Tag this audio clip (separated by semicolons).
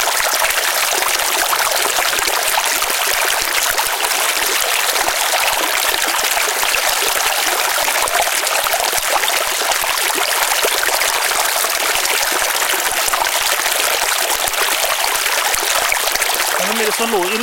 bubble; murmur; brook; field-recording; stream; water